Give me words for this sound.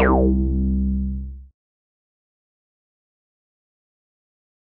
A acid one-shot sound sample created by remixing the sounds of